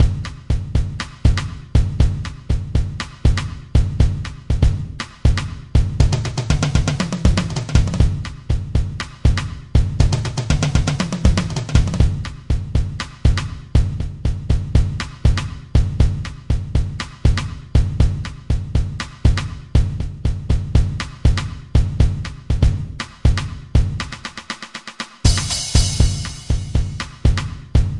Basic drumbeat loop used for MAZE